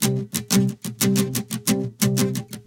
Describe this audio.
Sympatheque Guit A 1
jazz, music, jazzy
music, jazzy, jazz